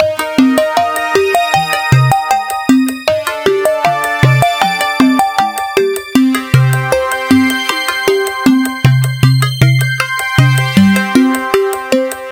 20140525 attackloop 78BPM 4 4 Analog 2 Kit mixdown10
This is a loop created with the Waldorf Attack VST Drum Synth. The kit used was Analog 2 Kit and the loop was created using Cubase 7.5. Each loop is in this Mixdown series is a part of a mixdown proposal for the elements which are alsa inclused in the same sample pack (20140525_attackloop_78BPM_4/4_Analog_2_Kit_ConstructionKit). Mastering was dons using iZotome Ozone 5. Everything is at 78 bpm and measure 4/4. Enjoy!